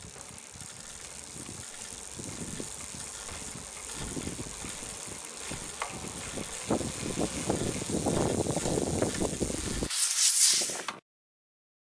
Brake Concrete High Speed OS
Mountain Bike Braking on Concrete